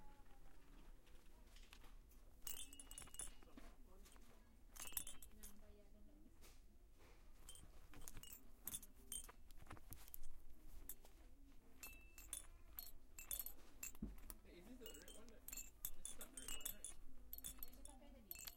chimey plastic toys, rattling around
one in a series of toy store recordings.
plastic, shaking